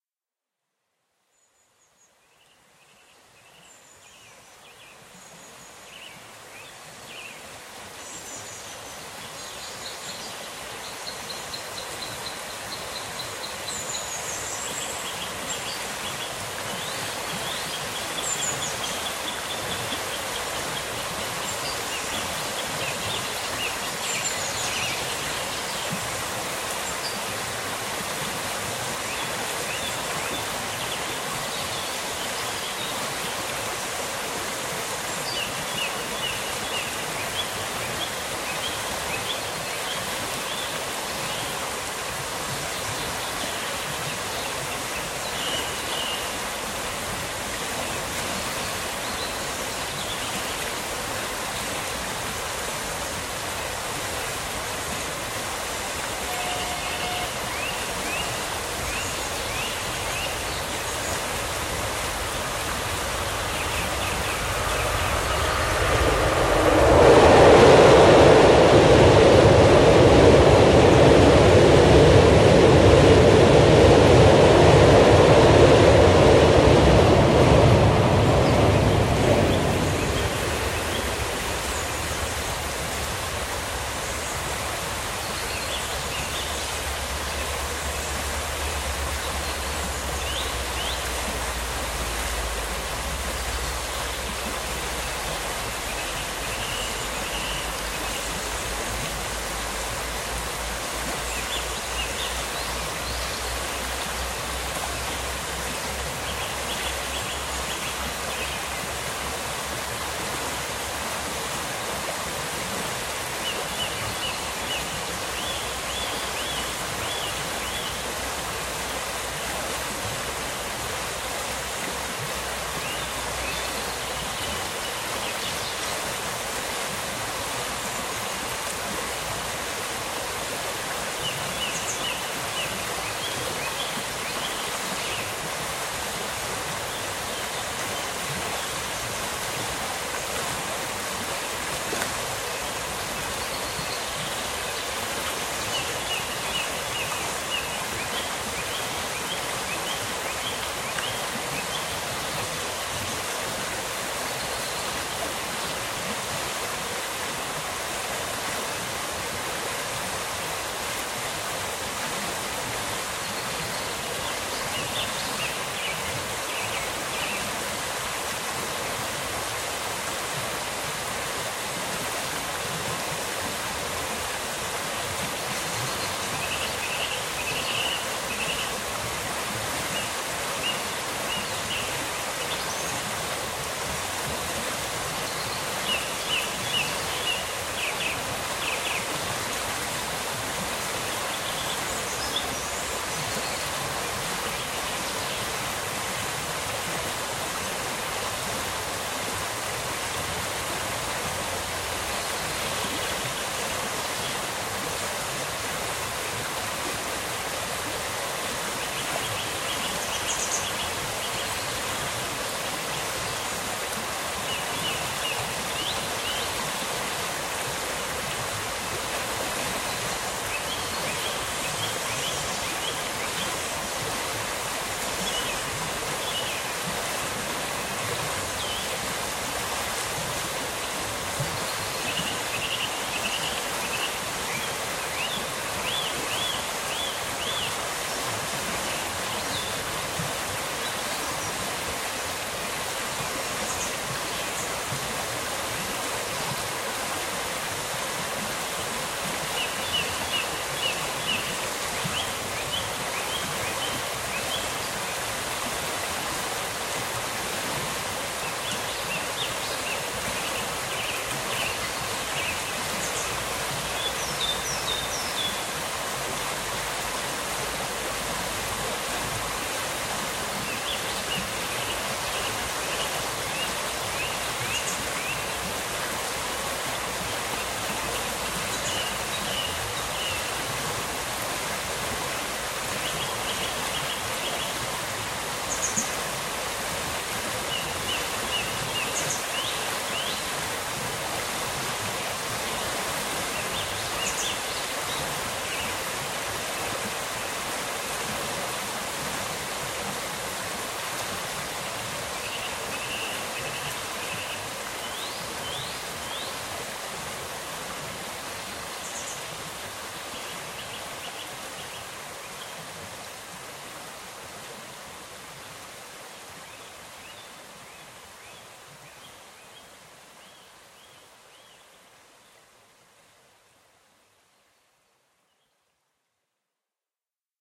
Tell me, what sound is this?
countryside : horse, dog, dogs, birds